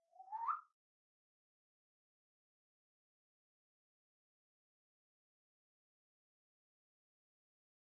Audio entrega stems sonido cola
ambiance, ambience, birds, birsds, field-recording, marshes, nature, south-spain, spring